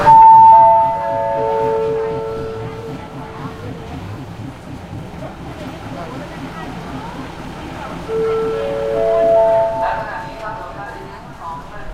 Thailand Bangkok, Wongwian Yai train station PA announcement beep tone left onmic right offmic +engine throb